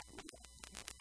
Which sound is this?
vtech circuit bend009
Produce by overdriving, short circuiting, bending and just messing up a v-tech speak and spell typed unit. Very fun easy to mangle with some really interesting results.
broken-toy, circuit-bending, digital, micro, music, noise, speak-and-spell